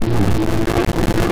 Mute Synth Broken Low Pitch 003
Often the oscilators are unstable and the sounds from the mutesynth can sound 'broken' and quite harsh.
Please see other samples in the pack for more about the Mute Synth.
Here are some examples of unstable and broken sounds from the low pitch oscilator
broken, digital, electronic, harsh, low-pitch, mute-synth, noise-maker, noisy, pulse, rough, square-wave